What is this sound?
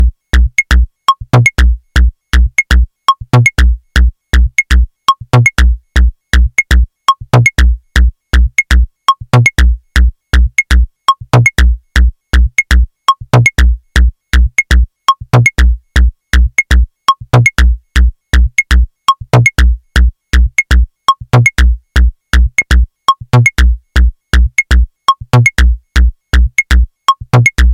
Some recordings using my modular synth (with Mungo W0 in the core)
Modular, Mungo, Analog, Synth, W0